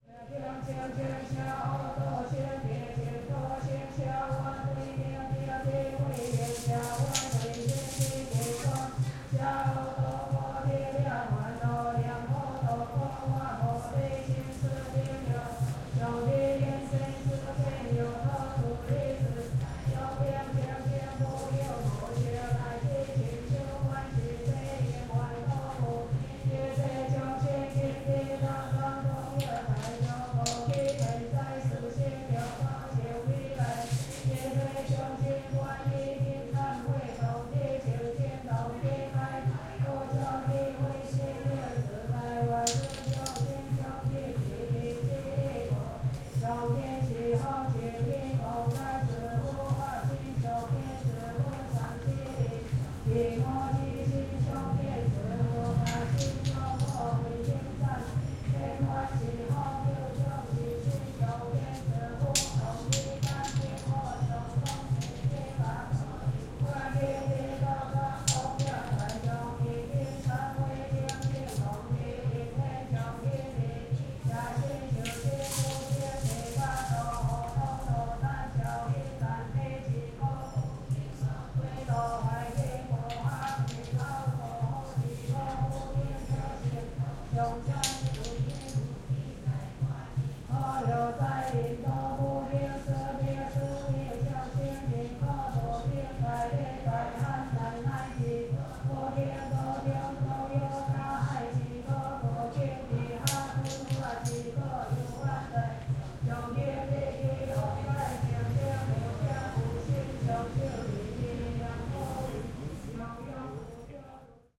Taiwan Taipei temple
temple, Asia, Taipei, Taiwan